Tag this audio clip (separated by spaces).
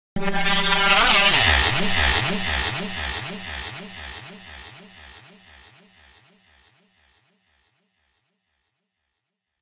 Chime Echo Echoes Eerie Eternity Hollow Overwhelming Terror Whistle